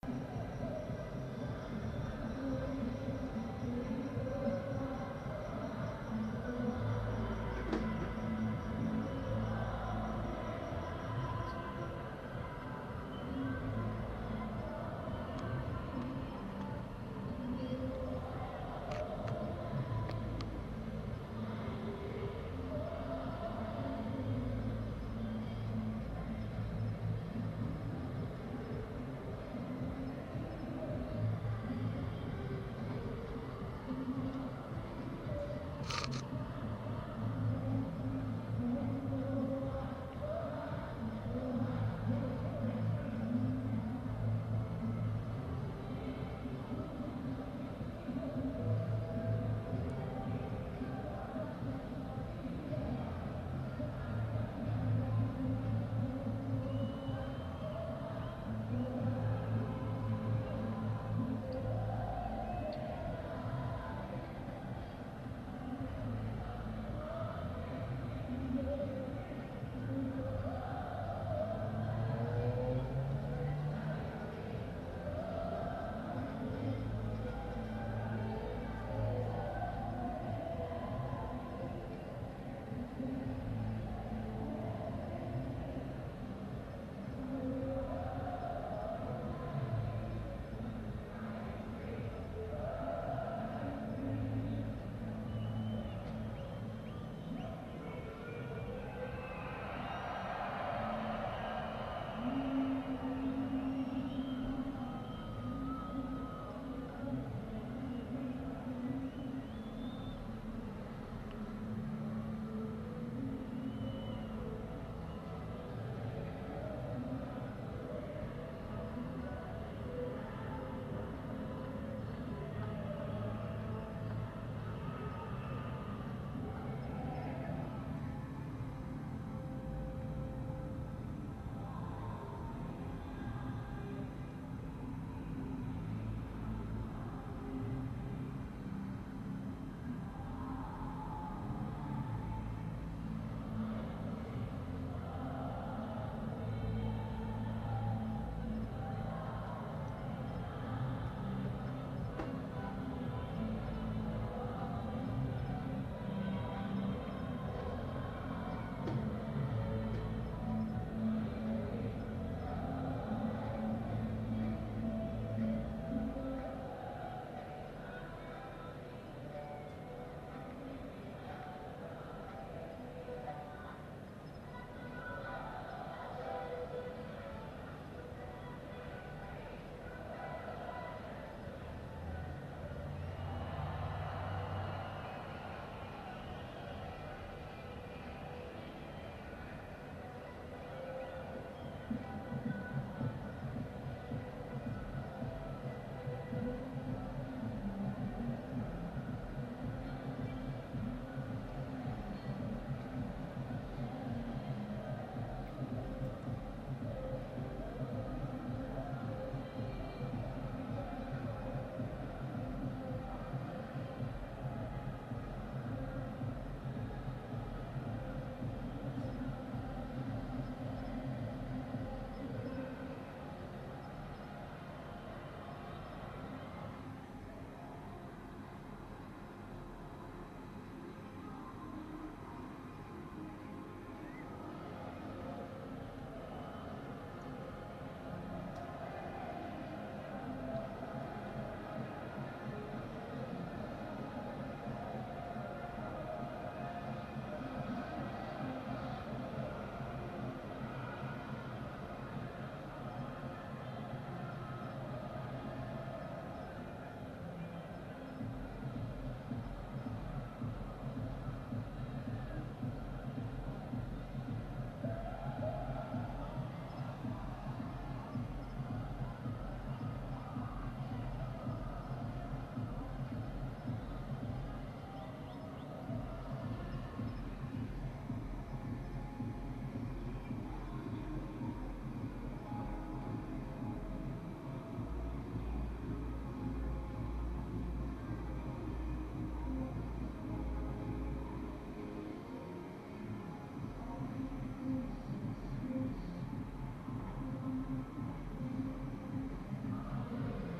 Beats and Eats Festival from a distance, Stuart Park, Illawarra Region, NSW, Australia, 18 November 2017.
beats-and-eats, dance, festival, music